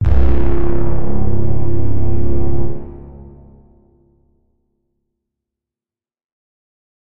Angry Boat 4
My take on the epic and ominous orchestral "BRRRRRRRRRM" sound often found in movie trailers, such as Inception, Shutter Island and Prometheus. I've nicknamed it the 'Angry Boat'.
This is Angry Boat sound 4, which has a digital distortion effect to give it a more robotic sound.
Made with Mixcraft.
angry-boat, BRRRRRRRRRRRRM, distortion, epic, horn, Inception, movie-trailer, ominous, Prometheus, Shutter-Island, strings, tension, trailer-music